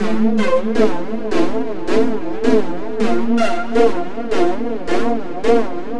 080bpm OrchSynthLoop
Synth, Loop, Orchestra, 080bpm